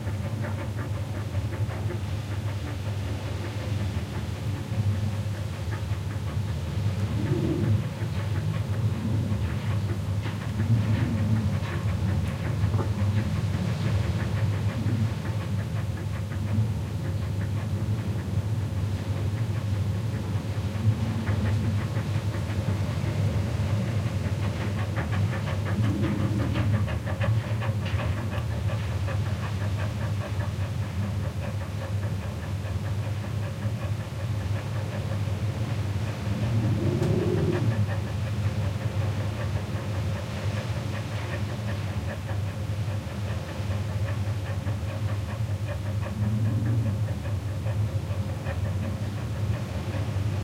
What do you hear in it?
Rumble of wind outside + dog pantings inside. Can be looped. Primo EM172 capsules in widscreens, FEL Microphone Amplifier BMA2, PCM-M10 recorder. Sanlucar de Barrameda (Cadiz province, Spain)